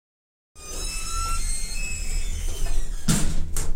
Squeaking doors
The sound of squeaky elevator doors closing
closing, Creak, door, Squeaking